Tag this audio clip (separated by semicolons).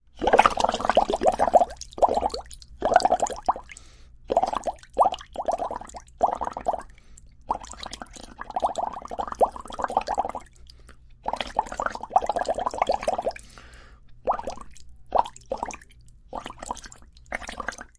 agua; bubble; burbuja; liquid; water